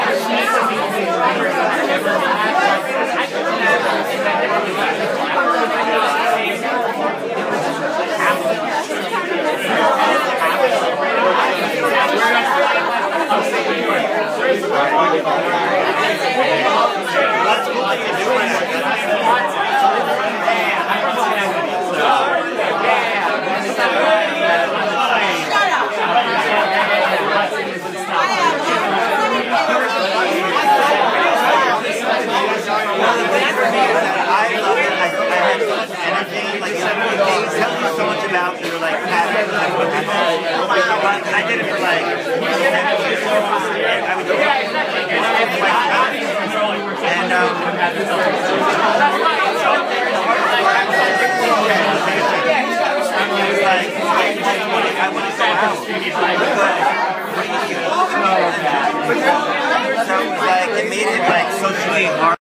I was at a party tonight and it was incredibly loud in the kitchen. I recorded one full minute with my iPhone. Enjoy.